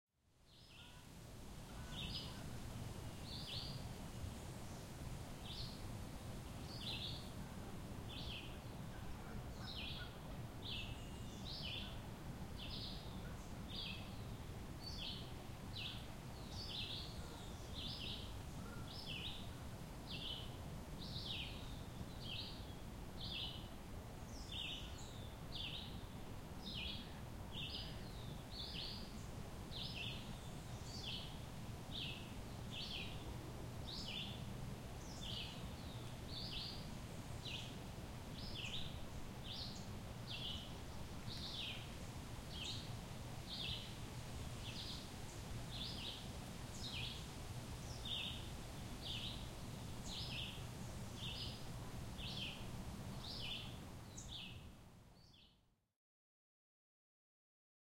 Birdsongs in Montreal's Parc de la Visitation
Zoom H4N Pro